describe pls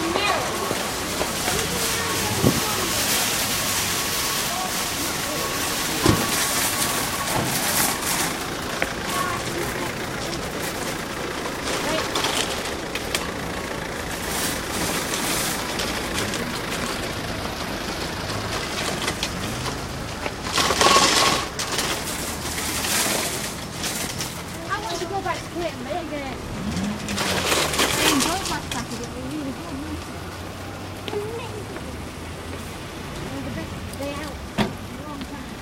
Asda car park
Short sound of the car park at Asda, there's cars driving past, trolleys being wheeled over cobbled paths (big thump noise) and other noises.